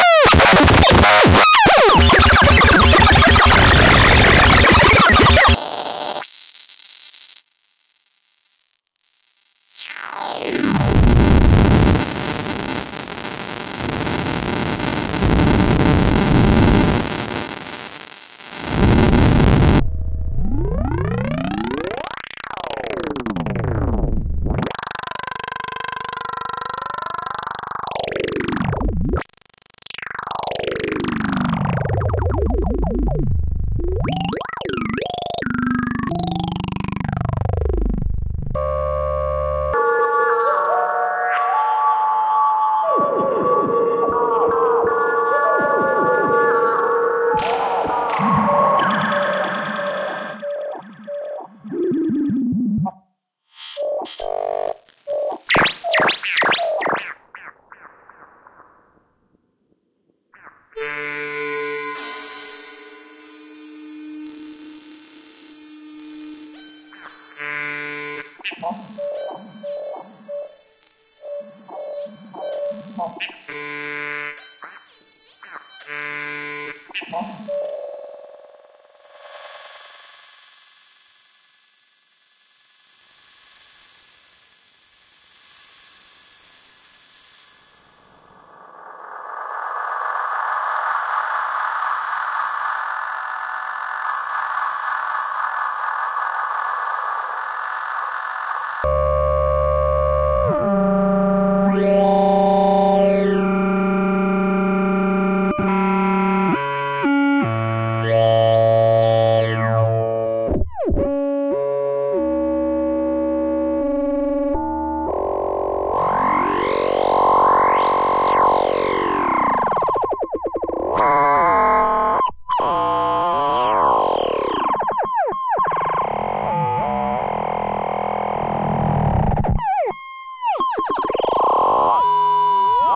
elektronische reel
Created with the Make Noise Tape & Microsound Music Machine for use in the Make Noise Morphagene.